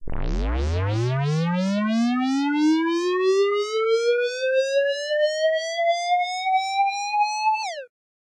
buildup square wahwah
Square buildup/rise made in Audacity with various effects applied. From a few years ago.
Rise, Square, Wahwah